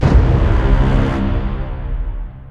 A loud and dramatic horn. Known from the movie "Inception." It was created via
a recording of myself playing an Ab note (below the scale of bass clef) on my BBb tuba and then adding things like distortion, echo, fade, etc. in Audacity to make it almost identical to the dramatic horn from "Inception."
bwong, dramatic-horn, tuba